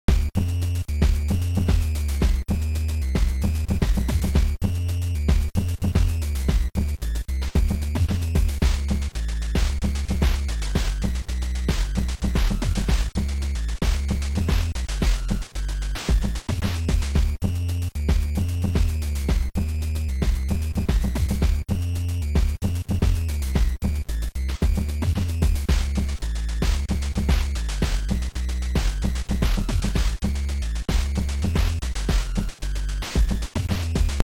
This is an 8-bit music loop made with Famitracker using the Triangle, Noise, and DPCM channel.